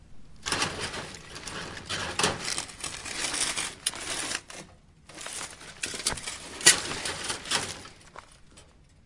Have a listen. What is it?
grece naxos walking 4
Opening of a handmade metal door in a small path near Tsikalario in Naxos island (Greece).